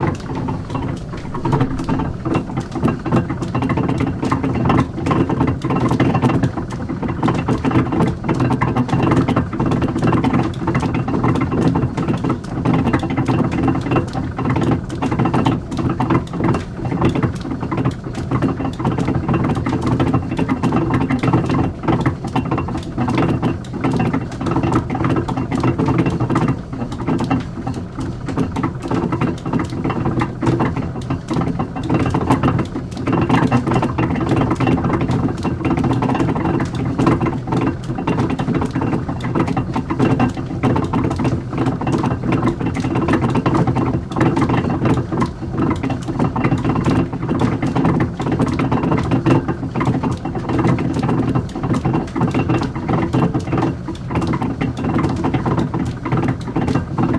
SE MACHINES MILL's mechanism 06 - other perspective
One of the machines in watermill.
rec equipment - MKH 416, Tascam DR-680
factory, industrial, machine, machinery, mill